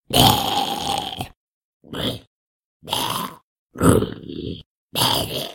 zombie groans
the sounds of a zombie-like monster growling/groaning